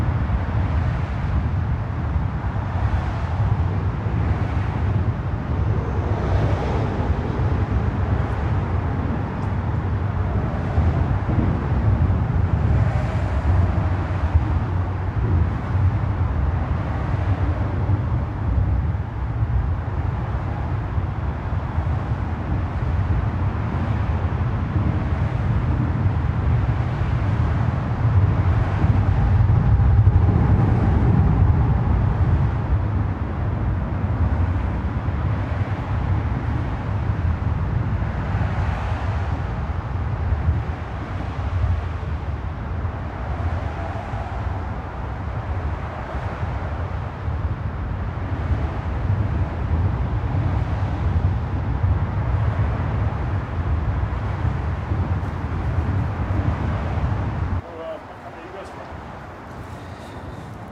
ambience bridge
Ambience recorded underneath Auckland Harbour Bridge at rush hour. Mono.
ambience, bridge, rumble, traffic, trucks